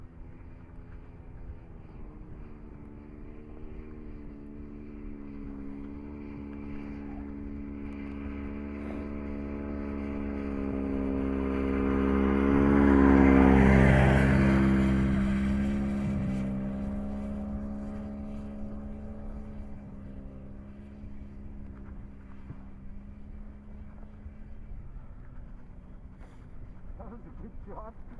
snowmobile pass by medium speed semidistant